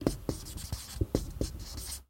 marker-whiteboard-short06
Writing on a whiteboard.
design, draw, dryerase, erase, foley, pen, pencil, sound, write